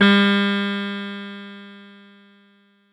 fdbck50xf49delay5ms
A 5 ms delay effect with strong feedback and applied to the sound of snapping ones fingers once.
cross, echo, feedback, synthetic